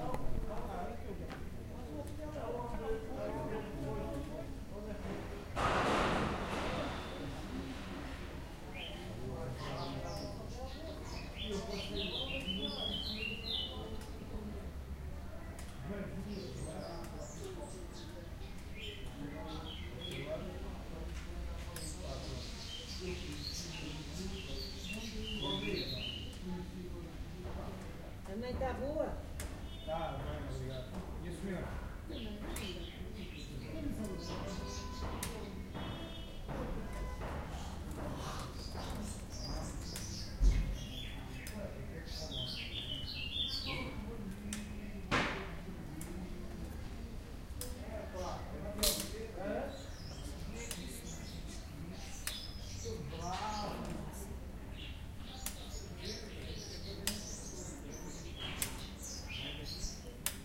STE-022-lisbon alfama08
The ambience of the Alfama district in Lisbon.
city, streets, lisbon